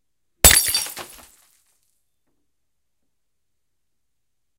Me dropping a vase off my deck onto a concrete patio.
breaking, glass, smash, vase